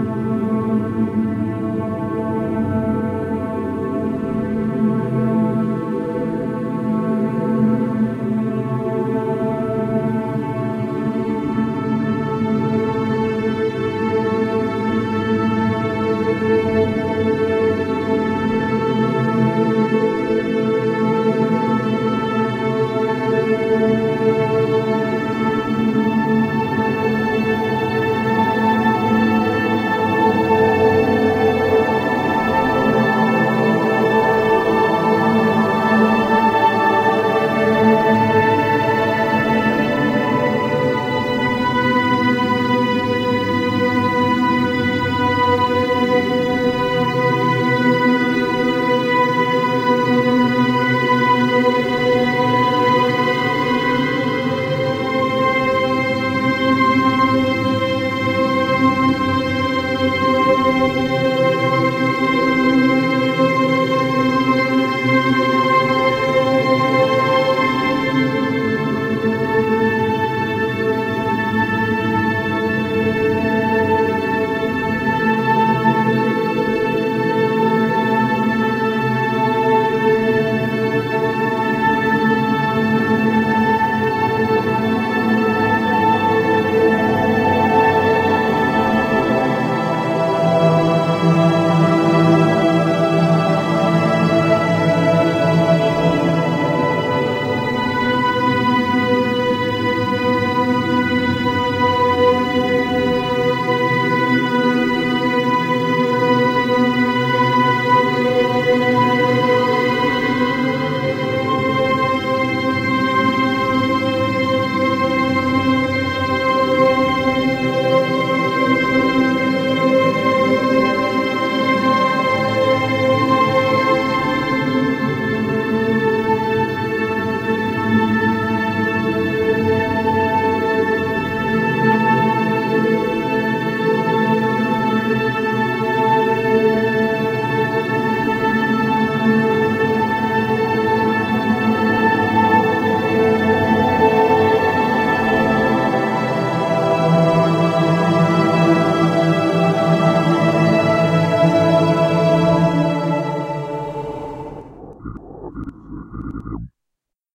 This was created by me as a background music for one of my short movie.
Cheers,
gV
atmosphere, stretched, ambiance, ambient, music, background-sound, soundscape, ambient-music, beautiful, ambience, atmo